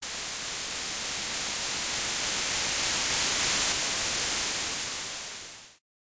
Longer simple noise rise created and edited on an old version of Audacity